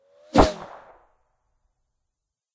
Bullet whiz
The samples I used were:
#78091 Ricochet 2_2 - Benboncan
bang, bullet, crack, fly, gun, pop, rifle, shoot, slow-mo, swish, swoosh, time, whiz, whizzes, whoosh, zoom